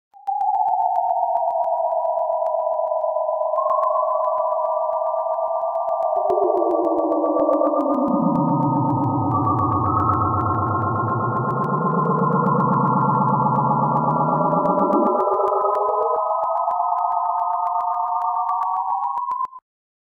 Longer sequences made with image synth using fractals, graphs and other manipulated images. File name usually describes the sound...
image, space, synth